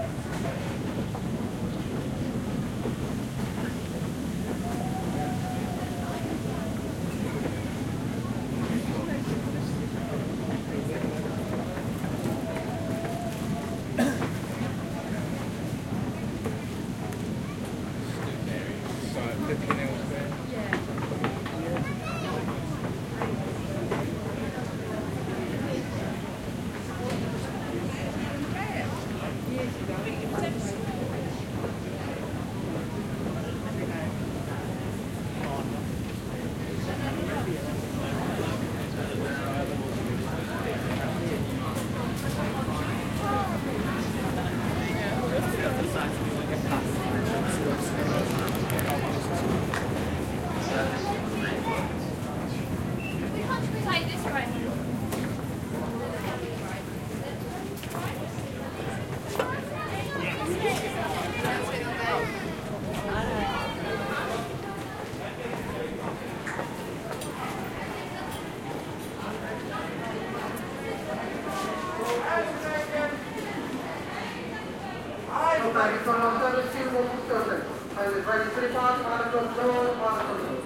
130217 - AMB INT - Victoria Station Escalators
Recording made on 17th feb 2013, with Zoom H4n X/y 120º integrated mics.
Hi-pass filtered @ 80Hz. No more processing
Ambience from victoria station's escalators
ambience, crowd, escalators, london, underground